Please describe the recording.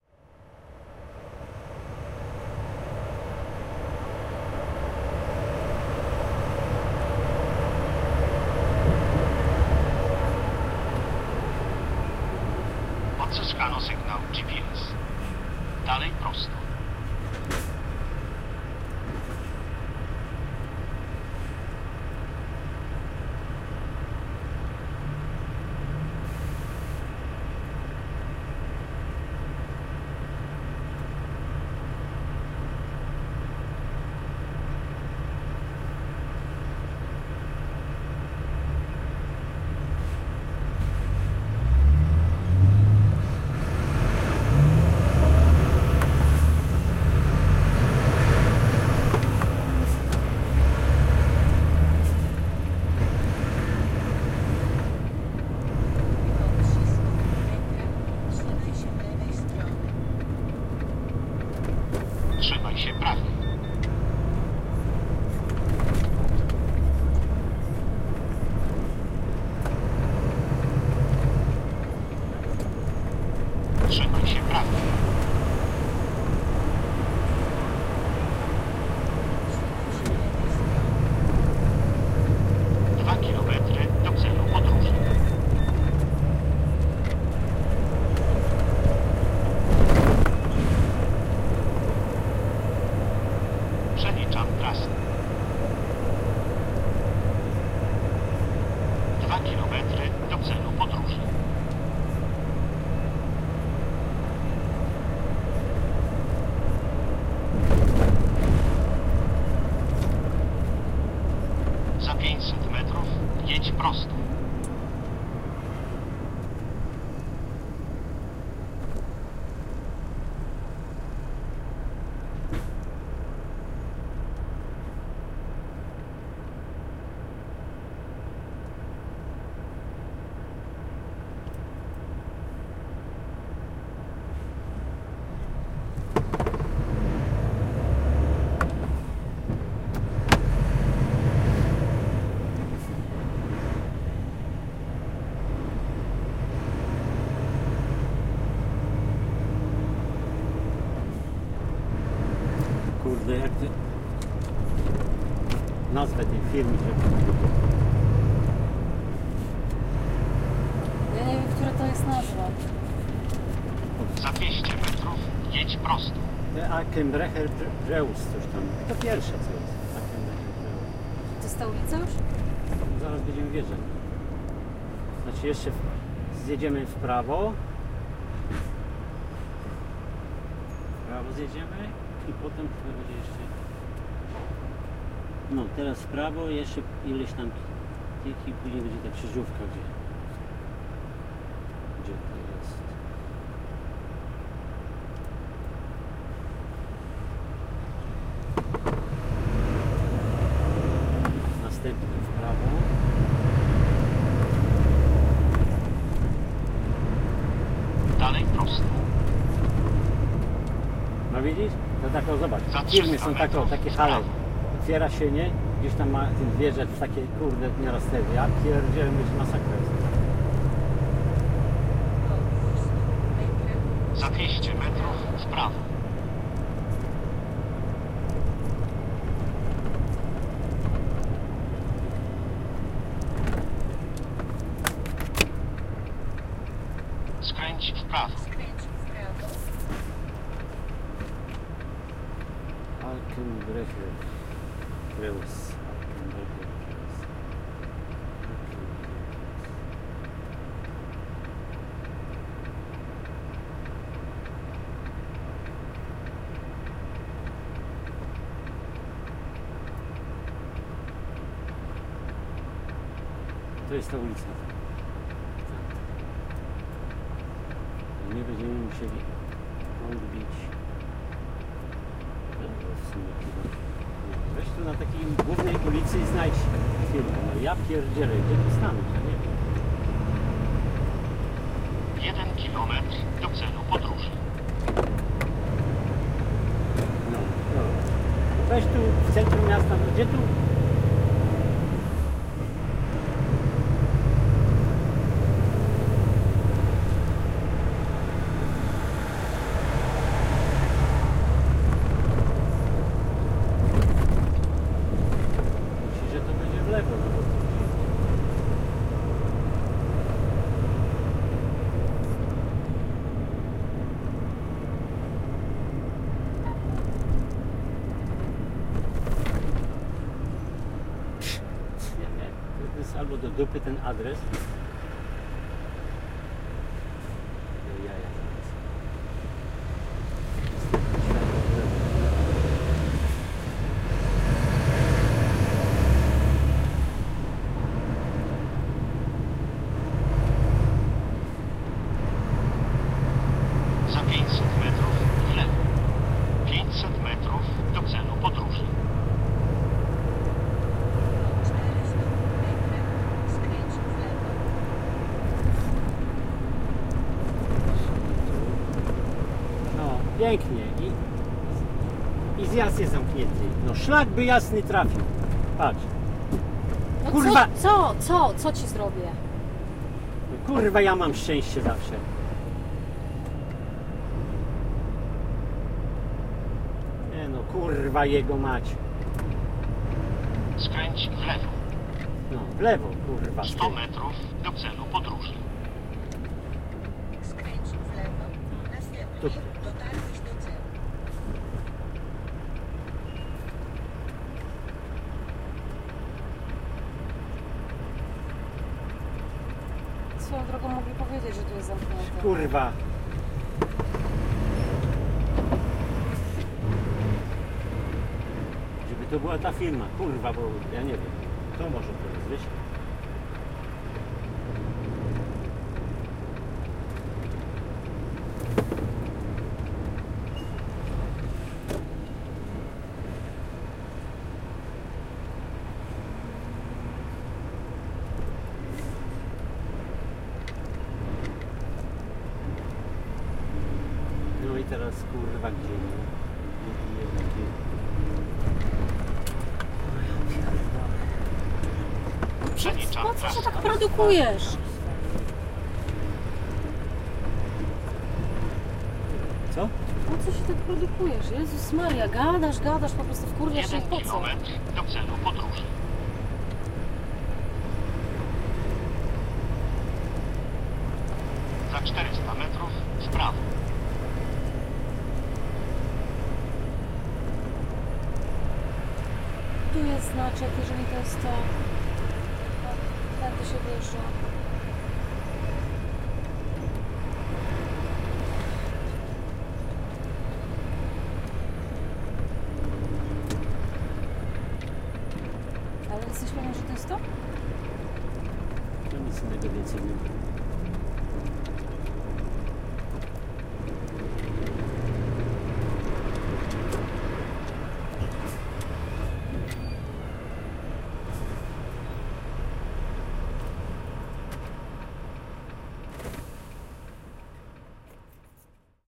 110819-enter to the center of hamburg
19.08.2011: twentieth day of ethnographic research about truck drivers culture. Hamburg in Germany. Noise of traffic and truck engine during the entrance to the center of Hamburg.
drone, street, truck, field-recording, engine, noise, traffic